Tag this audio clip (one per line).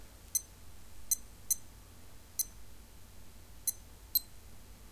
noise
shows